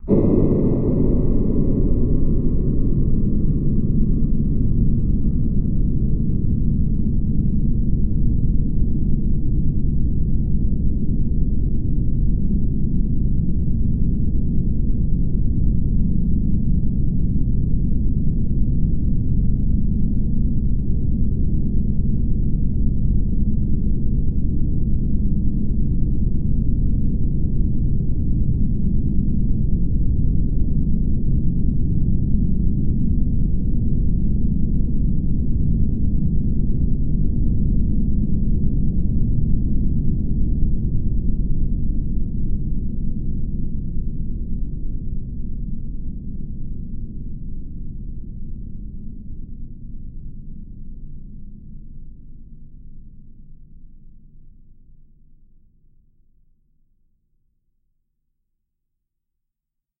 LAYERS 013 - FRYDAY is an extensive multisample package containing 128 samples. The numbers are equivalent to chromatic key assignment covering a complete MIDI keyboard (128 keys). The sound of FRYDAY is one of a beautiful PAD. Each sample is one minute long and has a noisy attack sound that fades away quite quickly. After that remains a long sustain phase. It was created using NI Kontakt 4 and the lovely Discovery Pro synth (a virtual Nordlead) within Cubase 5 and a lot of convolution (Voxengo's Pristine Space is my favourite) as well as some reverb from u-he: Uhbik-A.